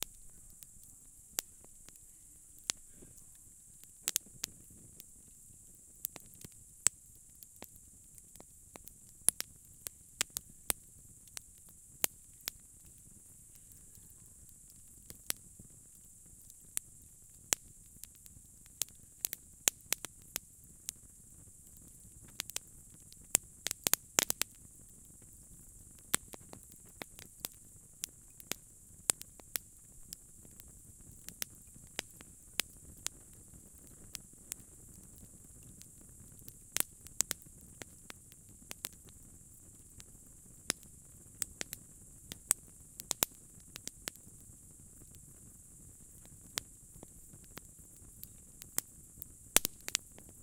oheň v lese v noci-flame in night in woods3
burning, fire, woods